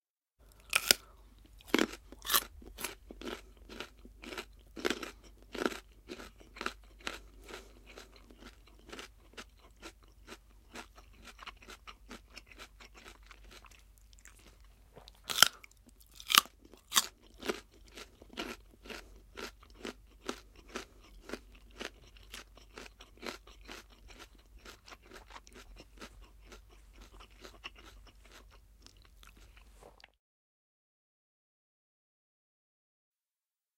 eating carot
chewing, Eating-carrot, munching